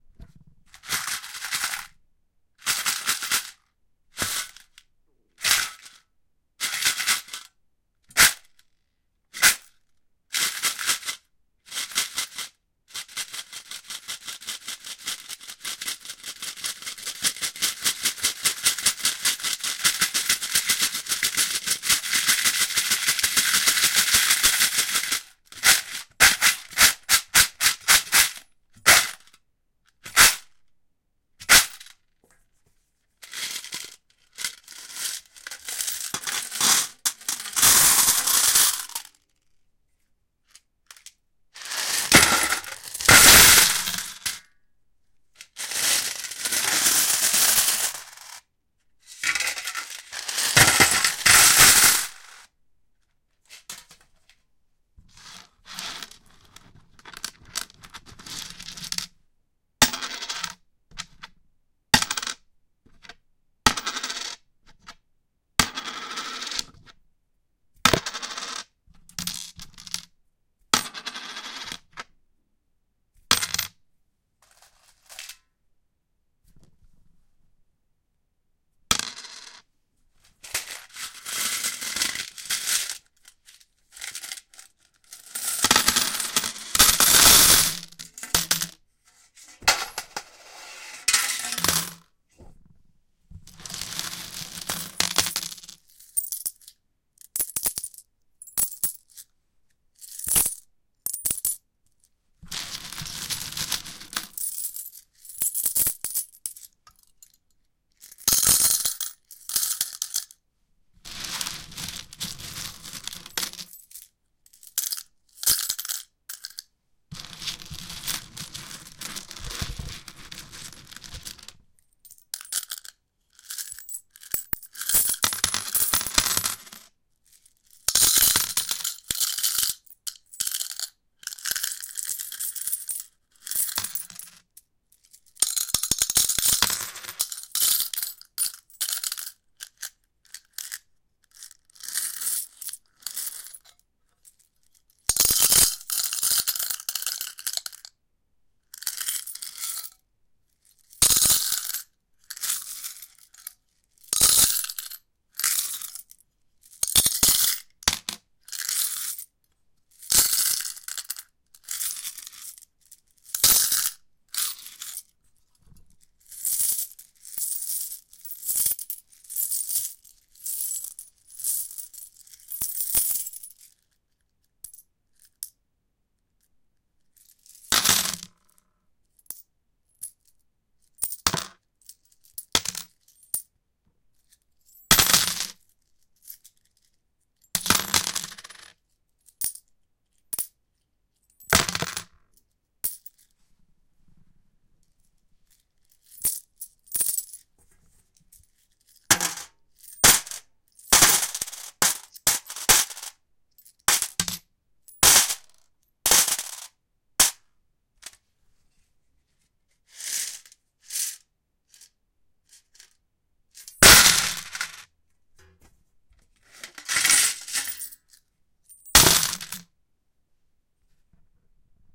Dropping some mad cash. I drop some coins on a metallic surface, move them, do things to them, unspeakable things.
Recorded with Zoom H2. Edited with Audacity.
money, crash, bash, metal, roll, h2, drop, bashing, zoom, smash, dime, pling, fall, zoom-h2, falling, coins, contact, nickle, rolling, metallic, metals, bling